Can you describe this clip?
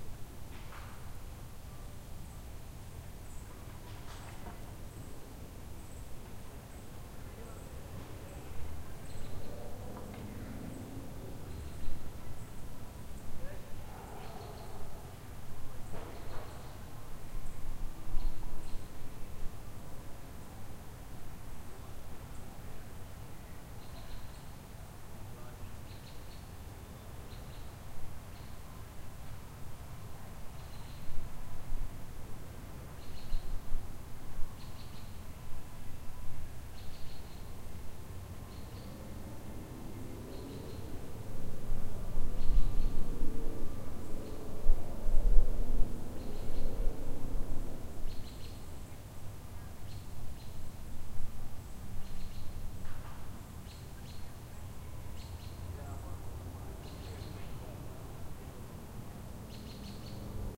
suburban ambience
Normalized recording taken with a Zoom H4n in my yard. The background noise is the wind in the trees.
urban, talking, house, town, trees, country, wind, birds, barking, ambience, dogs, suburban, yard